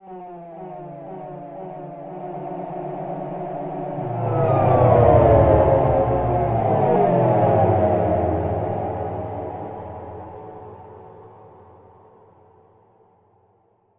Something i did last year on me computer whilst just working out how to use logic audio, methinks. From the filename, i obviously thought it sounded a bit like the THX sound.